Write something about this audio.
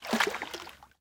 Water slosh spashing-3
splash, water, environmental-sounds-research